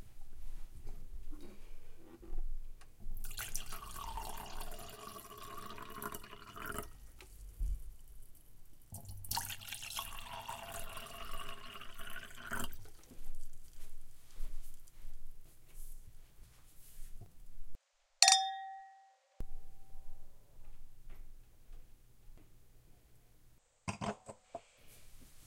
Pouring Wine
A field recording of wine being poured into two glasses, then the glasses clinking together.
clink, field, glasses, pour, recording, wine